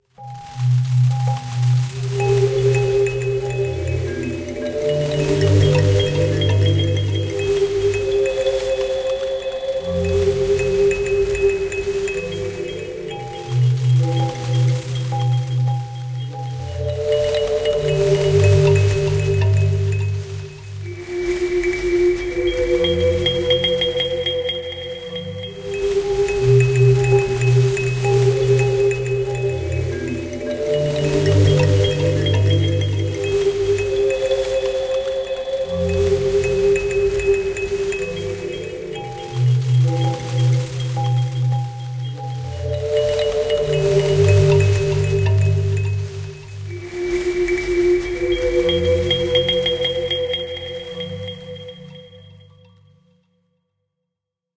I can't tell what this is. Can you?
Jungle relax
I made the score in Maestro.
Spitfire LAB
SFX conversion Edited: Adobe + FXs + Mastered